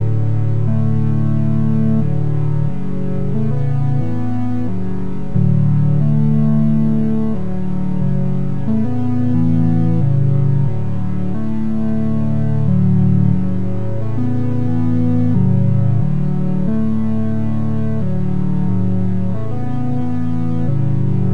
After some work with synths, it turned out to be such a relaxing melody.